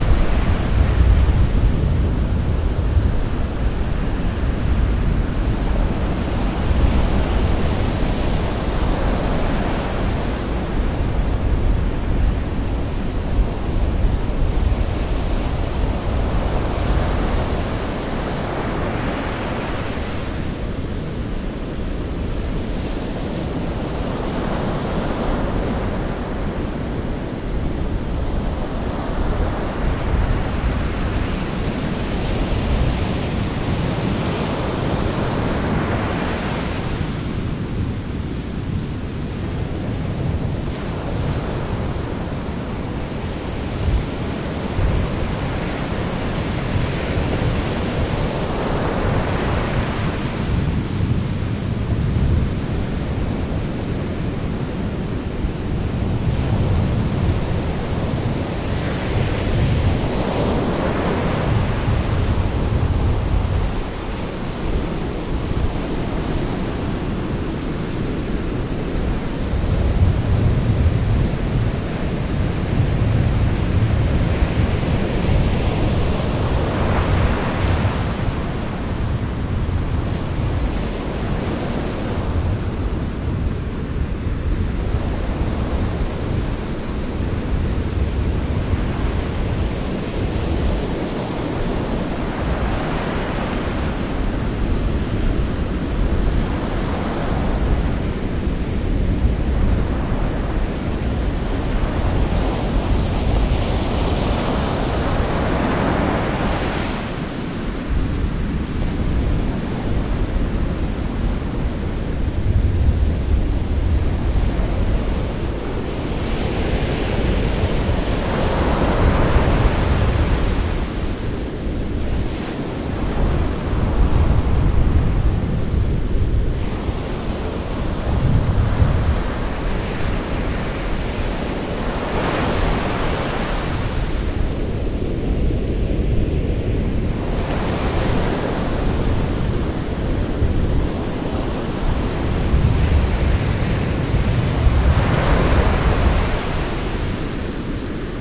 Olas rompiendo en una bahia de paracas grabadas con el micrófono estereo xy de un zoom H6.
Bahia, Sea, Mar, Olas, Beach, Paracas, Waves